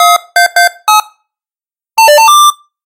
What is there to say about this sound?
Code, Access Granted
This sound can for example be used in games - you name it!
action, app, application, beep, bleep, blip, building, button, buttons, corridor, film, game, guards, hall, hallway, hour, interface, machine, passcode, patrol, push, rush, rush-hour, select, stealth, stress, suspense, ui, user, user-interface